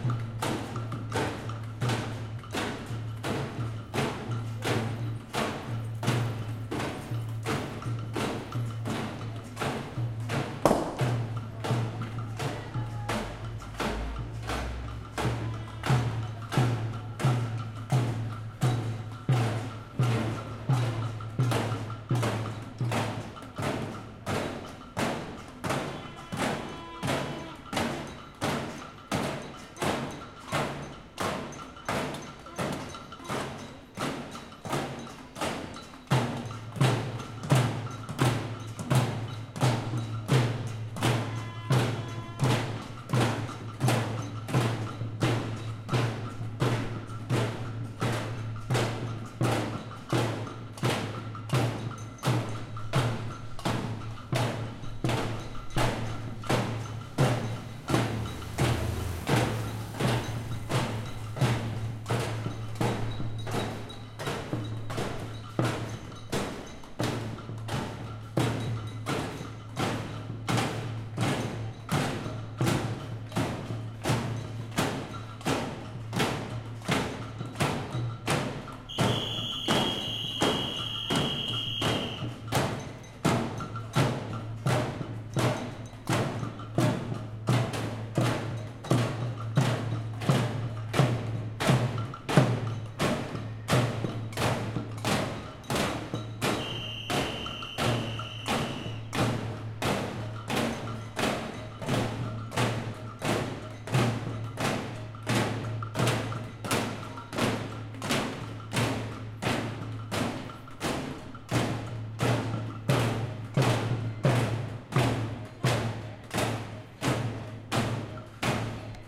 Street protest due to electrical power shutdown, Buenos Aires, Feb. 2015
batucada, gritos, protesta, Riot, street-protest